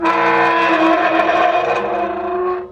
Heavy wrought-iron cemetery gate opening. Short sample of the shivery groaning sound of the hinges as the gate is moved. Field recording which has been processed (trimmed and normalized).
gate, groan, hinges, iron, metal, moan, shiver, squeak